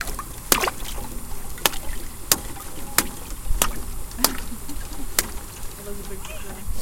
Breaking Ice
The sound of thin ice breaking as it's hit.
cracking, frozen, breaking, winter, ice